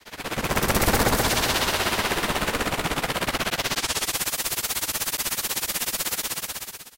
Helicopter passing by
Noisy rattling that sounds like a helicopter flying by.
Created using Chiptone by clicking the randomize button.
sound-design, sfx, noise, effect, fx, Chiptone, retro, video-game, soundeffect, digital, pinball, arcade, electronic